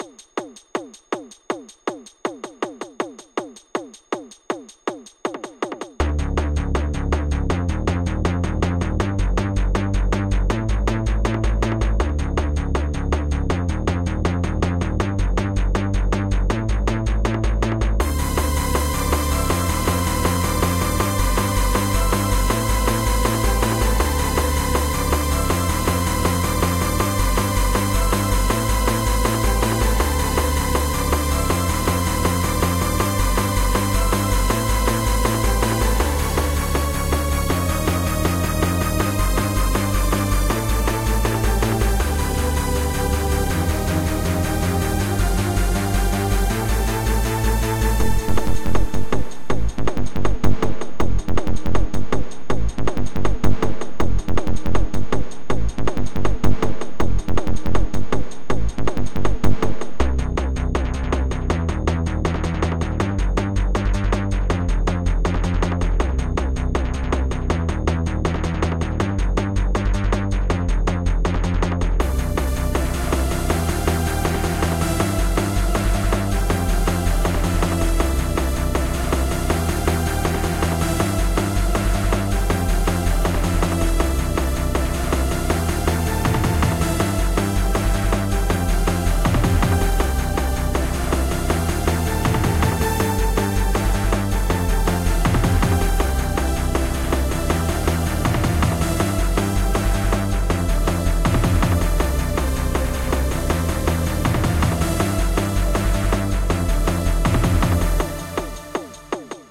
NguyệtChâu - Tiệc Vui Giang Hồ
Music loop for level 9 to 16 in game Nguyệt Châu, create use Garaband. 2010.04.28 18:26, longer version 2014.07.10
u, Nguy, game, 160-bpm, music, t-Ch, percussion-loop, loop